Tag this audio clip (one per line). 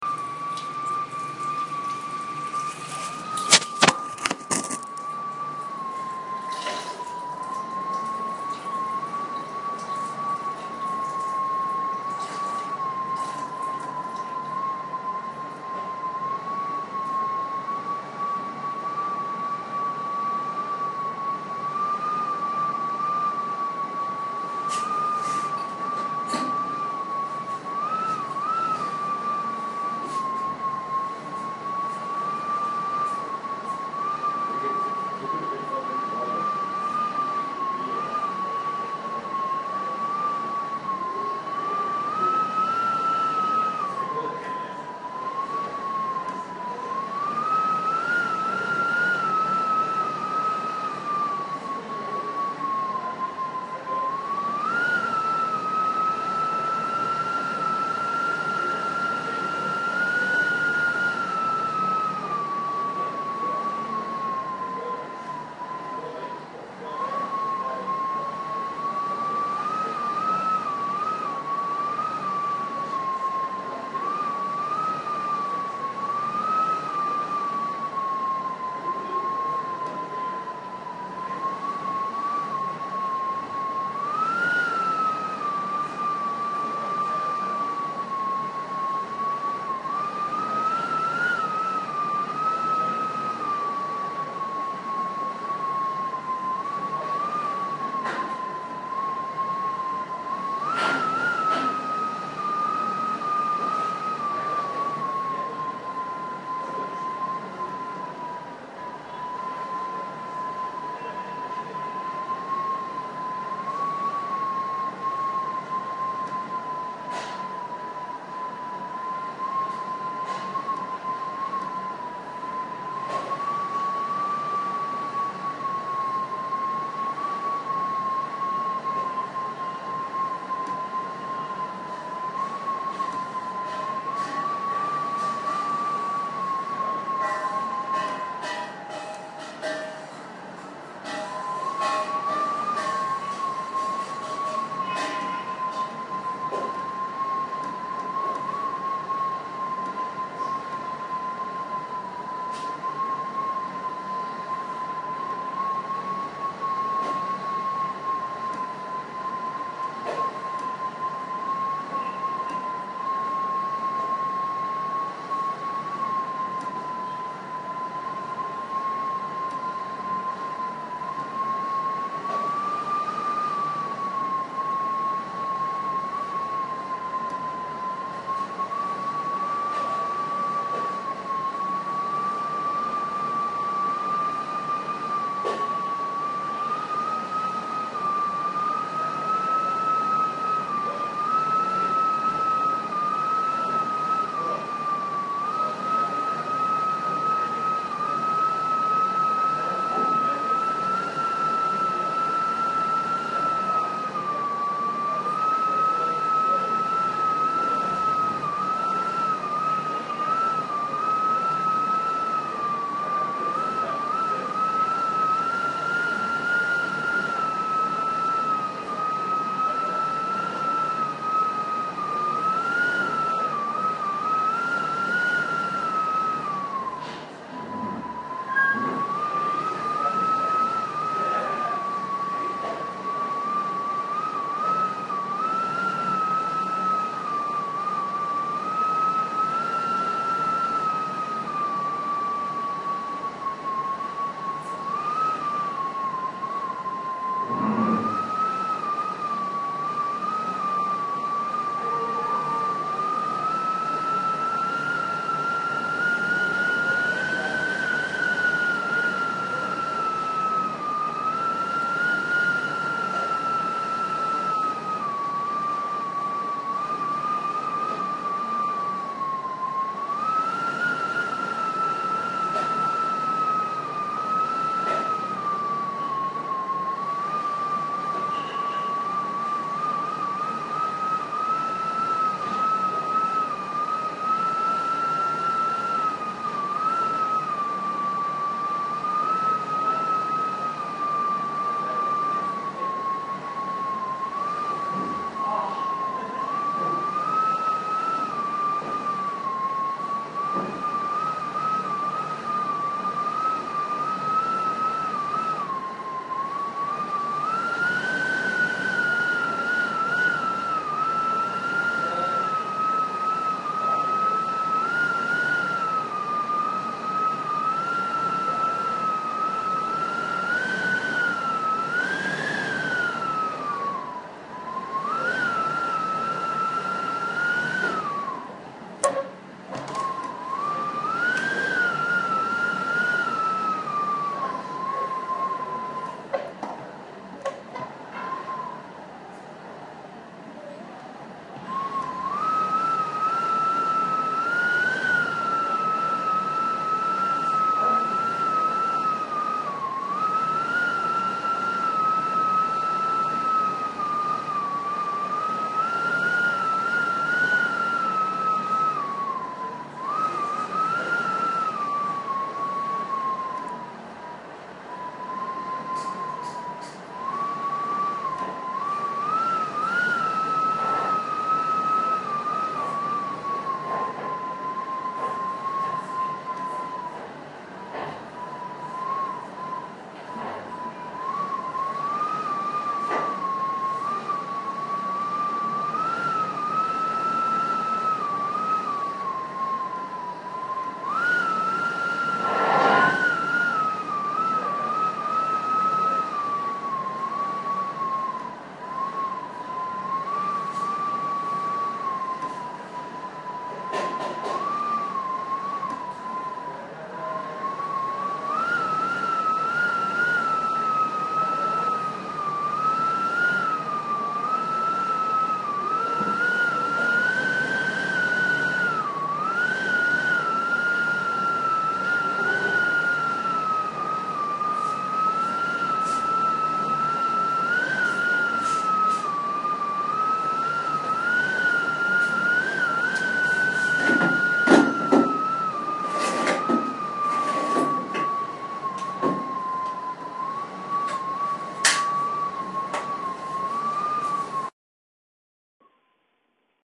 alexandria
relaxing
wind